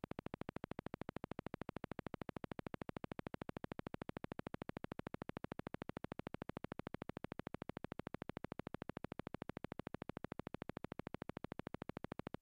gun shot maths macro oscillator mutable braids instrument make noise sounds environment natural surrounding field-recording ambient ambience noise scrub sci fi pulse